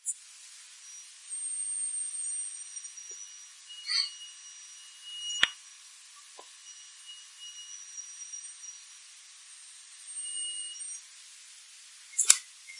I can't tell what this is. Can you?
Transformer noise cheeping
This is the recorded and filtered noise of an electronic transformer inside a battery recharger. I recorded it with my BB-Playbook, then loaded it into Audacity. I used a noise filter first, then I normalized the sample. After that I used a equalizer with weight on the high part and a high-pass filter at 1200Hz. Again at the end a noise filter. I moved the Playbook during the recording over the charger, that's why you can see th movement profile. Also you can hear me snuffle. This is pure ache!
cheeping, distortion, electronic-noise, noise, painful, tinnitus, toothache